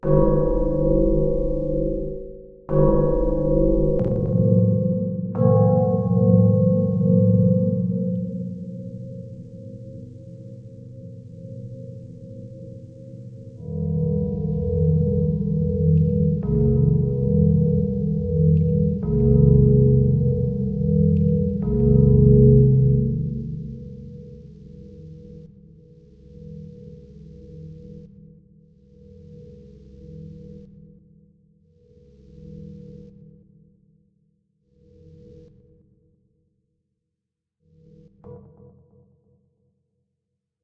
deep pad sounds based on mallet sounds, physical modelling